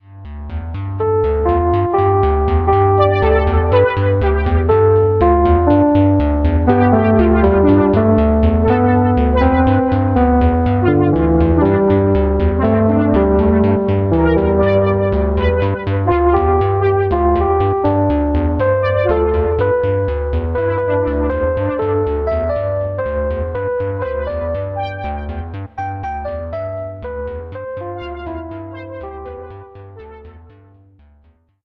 Lost Moons -=- Cosmos Blanket
A far out melody for you to enjoy... o_0